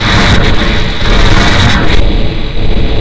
Modular Noise Bits 6
These samples were cut from a longer noise track made in Glitchmachines Quadrant, a virtual modular plugin. They were further edited with various effects.